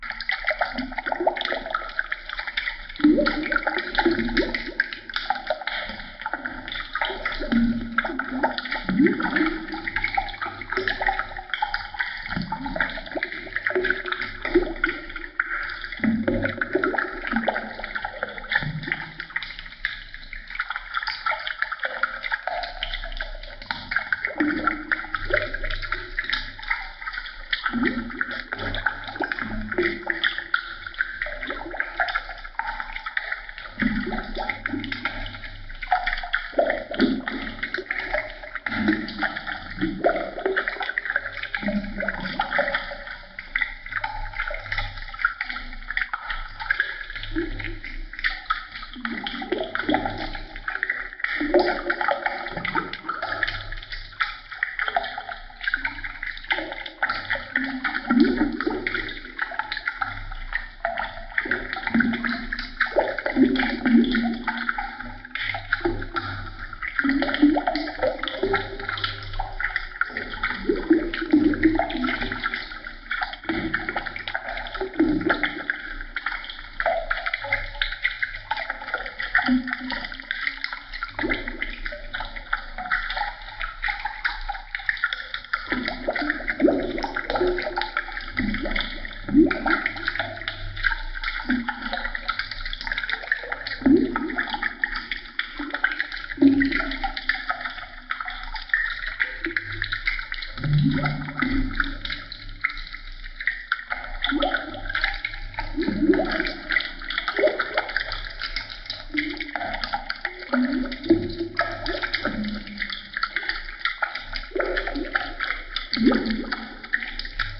Creepy Hellish Bubbling
Hellish noise of boiling liquid artwork.
hell, eerie, bubbles, boiling, creepy, horror, noise, liquid